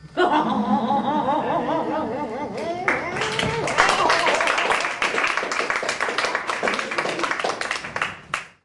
Audience Laughing Applause07
Audience in a small revue theatre in Vienna, Austria. Recorded with consumer video camera.
applause, applauding, crowd, audience, clapping, group, laughing, cheering